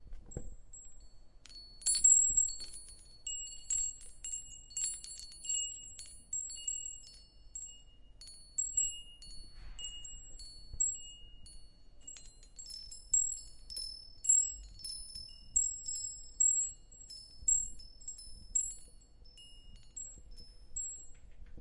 2. Small bells
Ringing of small bells by the door
glockenspiel, chime, doorbell, bell